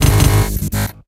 robotic transform 4
Robotic transforming sfx for motion, tranformation scenes in your logo video or movie.
sound, motion, sci-fi, glitch, space, abstract, transformer, droid, trailer, spaceship, futuristic, mechanical, construct, transformation, machine, robotic, android, sfx, cyber, wobble, grain, robot, granular